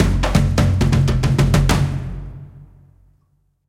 african fill002 bpm130 2bar
african, percussion, loop